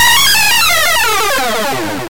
Outlane/failure (square wave)
Square wave version of Outlane alert
8-bit, 8bit, arcade, chip, game, retro, video, video-game, videogame